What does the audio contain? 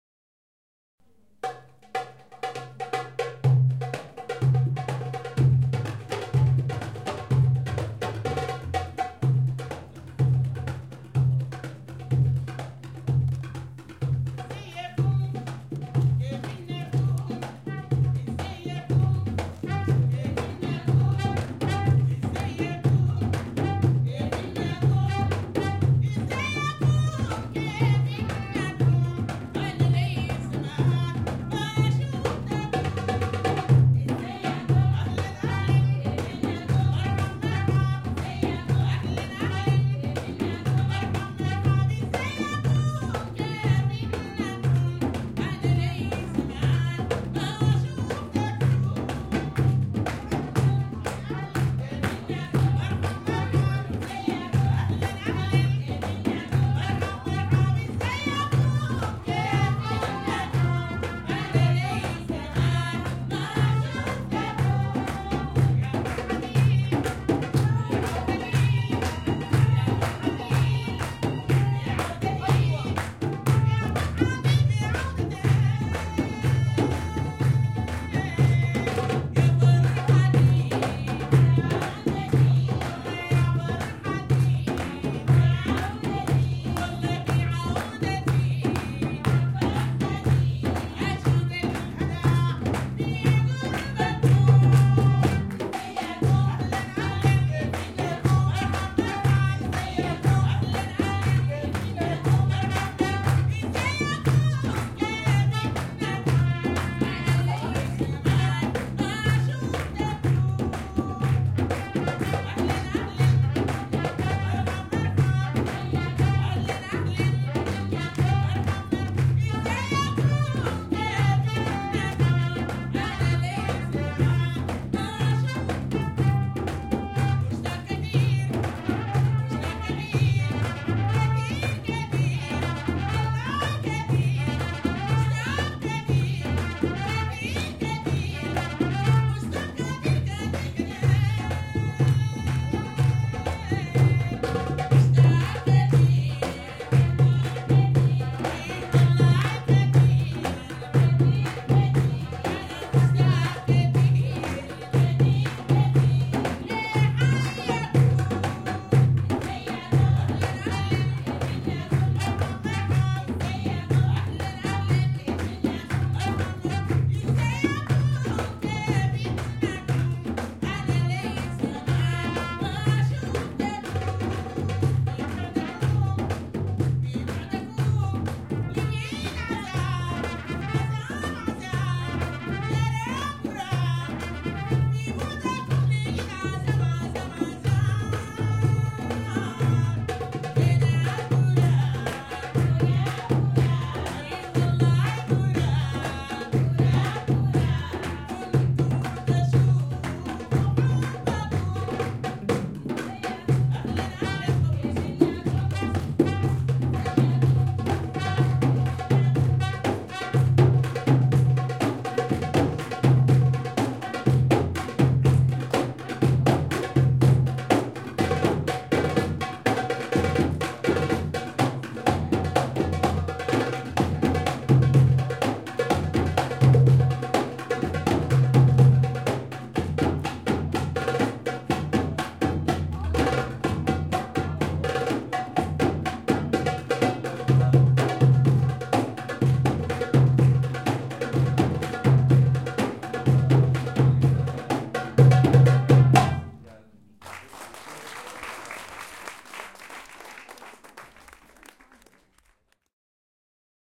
Settouna Show 2
2014/11/25 - Cairo, Egypt
Music show at the Egyptian Center for Art and Culture : Makan.
Saudian music.
ORTF Couple with windscreen